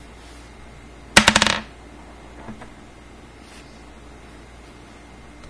this was made by me dropping a gumball